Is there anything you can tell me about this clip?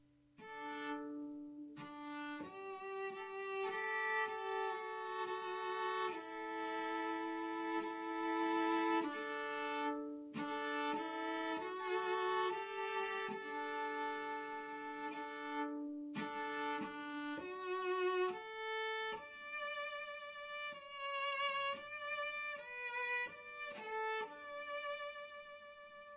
A real cello playing high notes up on the finger board. Recorded with Blue Yeti (stereo, no gain) and Audacity.